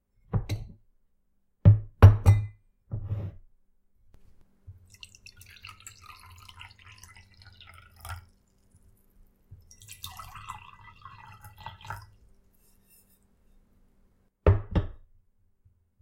Glasses being put on a table, followed by two drinks being poured. Recorded in my house on an AT2020.
liquid, drink, cocktail